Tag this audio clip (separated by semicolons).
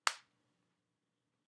cling; hand; hi; nail